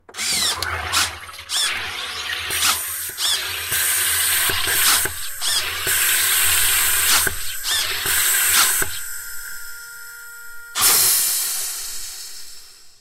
automatic-gas-actuator
recording of an automatic sodastream filling a bottle of water.
Tascam DR-100mk3
gas-actuator, sodastream